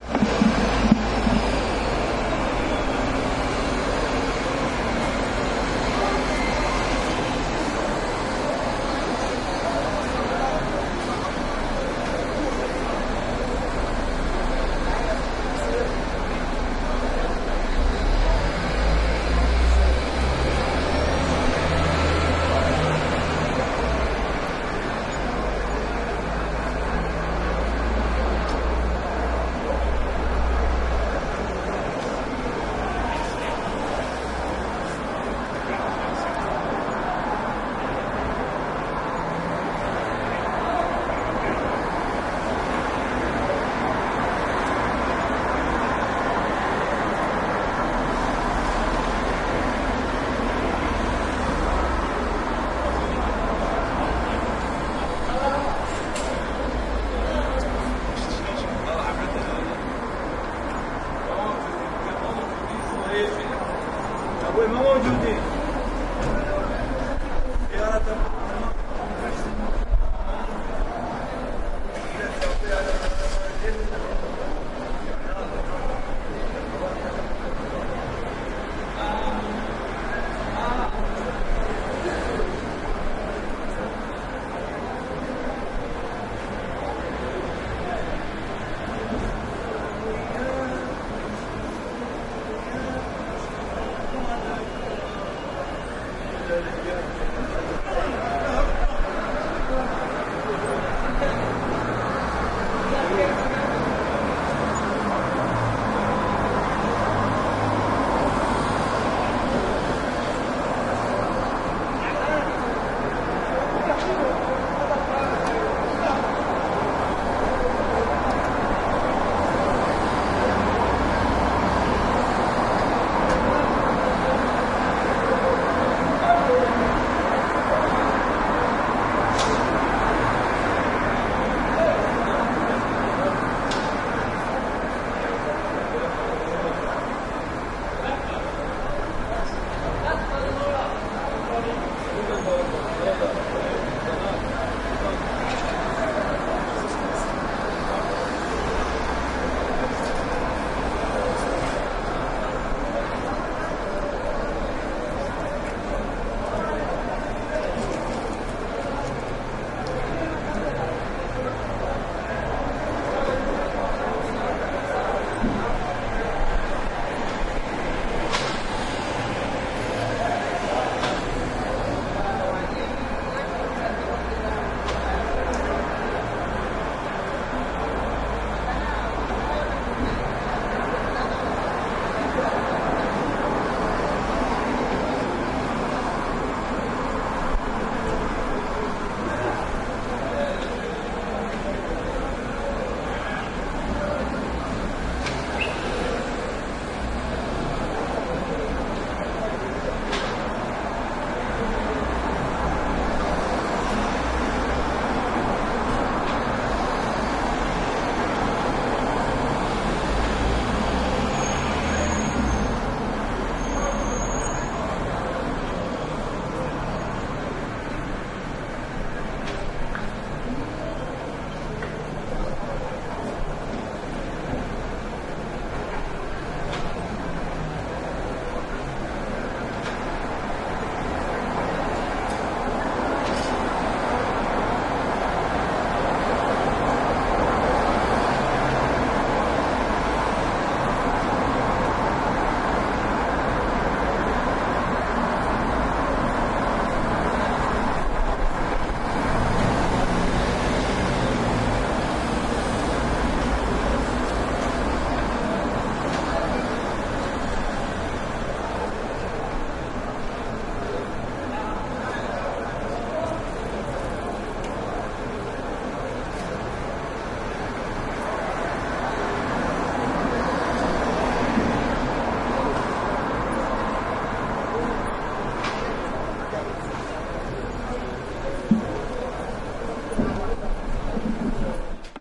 Grønlandsleiret at night (X+Y)
Recorded on a summer night with my Roland R-26 (positioned by the open windows facing out onto Grøndlandsleiret).
Oslo, field-recording, street